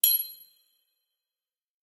Iron Nail dropped on Metal Stage weights... Earthworks Mic... Eq/Comp/Reverb